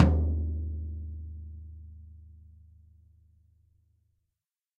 Toms and kicks recorded in stereo from a variety of kits.